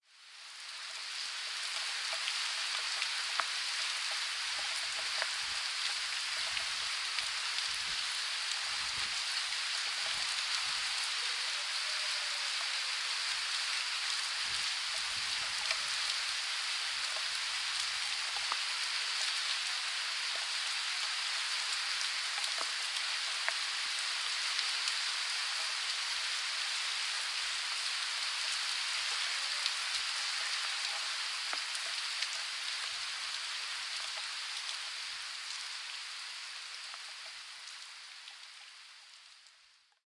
RAIN (RANDOM)
RAINY, RAIN